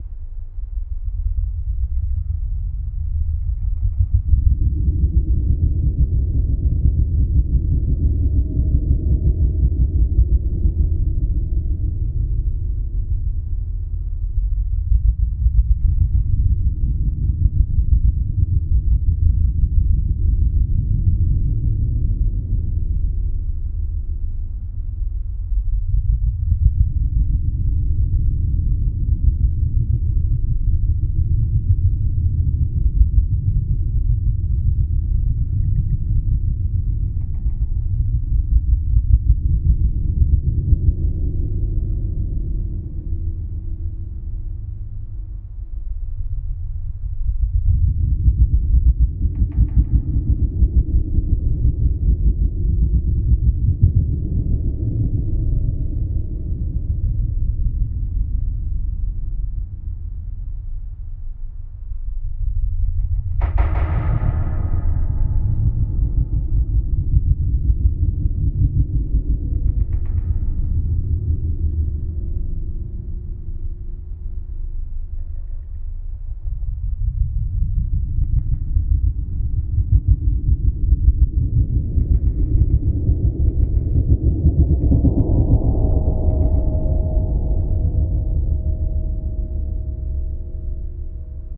Metal Board Wobble Stretch Ambience
A stretched out sample of a big wobbling metal board, good for spooky ambiences.
Stretch, Ambience, Metal, Board, Wobble